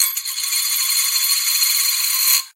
coin spinning on ceramic plate
cash, money, payment